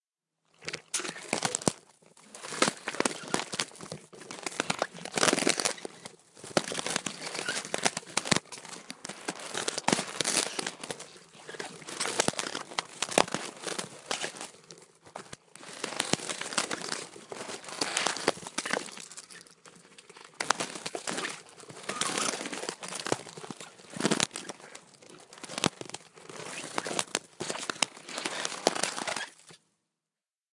crunching scraping
Combination of crunching and scraping sounds.